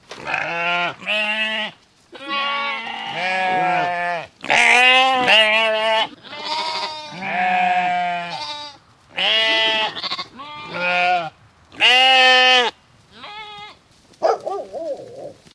Sheep bleating on a sheep farm. Recorded with a FlashMic.
animals, baa, bah, bleat, farm, field-recording, sheep